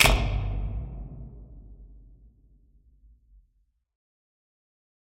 spotlight-stereo
large searchlight turning on in medium outdoor space. to make effect sound closer increase low-end. 2-channel stereo.
floodlight, movie-feature